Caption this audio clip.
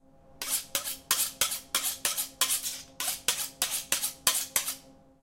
That's a knive being sharpened. Recorded with a Zoom H2.
sharpen, butcher